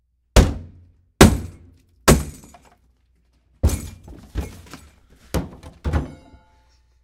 door wood hits impacts small break through clumsy metal thing fall